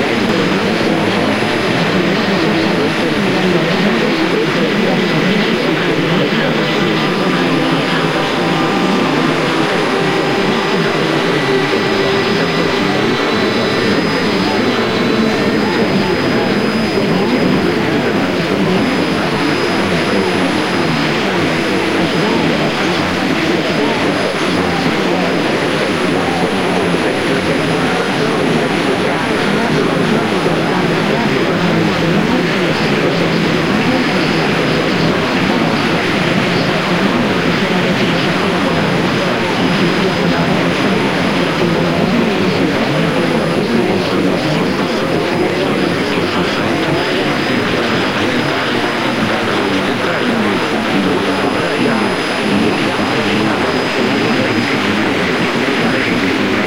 shortwave layered
this sample started a 6 tracks recorded from various places across the shortwave bands. each was adjusted for level prior to mixing in Audacity. A small amount of bass-boost is applied as well as some compression. one channel has been offset in time about 1 second. Icom IC706, Zoom H4.
radio, shortwave